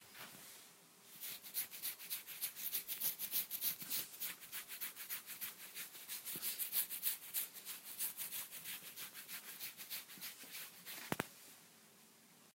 scratch their heads
scratching head with rigth hand
hand, head, rigth, scratching